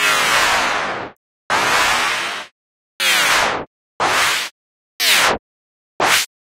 Heavily processed own voice sample that resembles some kind of increase/decrease effects or short laser energy beams.
Edited with Audacity.
Plaintext:
HTML: